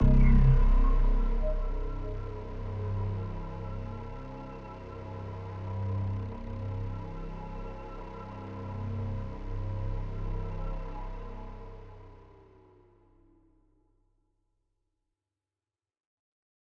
Soft Ambience
I used layers of HQ vsts to make a nice dark Ambient. 9 layers = 60 % CPU